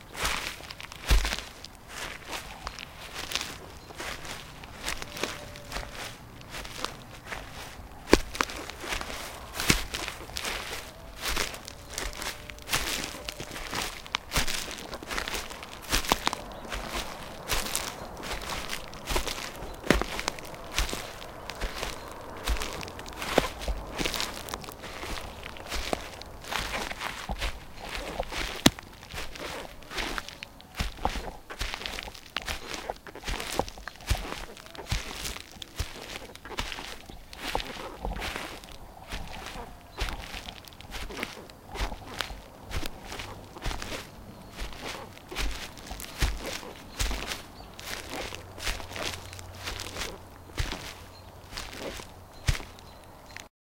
09 Footsteps on leaves; faster pacing; open space; near; nature
Faster pacing; open space; near; nature; birds; footsteps; leaves field-recording forest ambient
ambient, birds, Faster, field-recording, leaves, nature, near, open, pacing